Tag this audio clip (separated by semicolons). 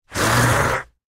beast; beasts; creature; creatures; creepy; growl; growls; horror; monster; noises; processed; scary